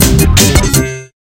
Cartoon beat start
cartoon, start